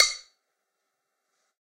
Sticks of God 023
drum, drumkit, god, real, stick